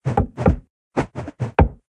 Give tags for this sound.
quarterstaff; staff; staff-hit

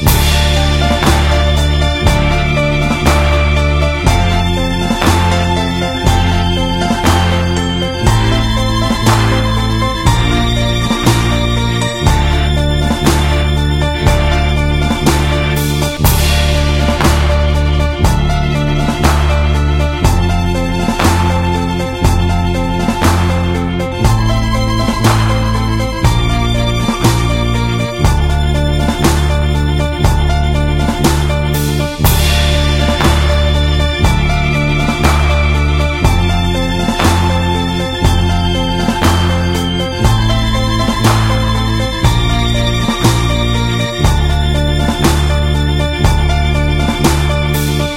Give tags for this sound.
battle; game; gamedev; gamedeveloping; games; gaming; indiedev; indiegamedev; loop; music; music-loop; victory; videogame; Video-Game; videogames; war

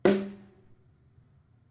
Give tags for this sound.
bongo; drum; kit

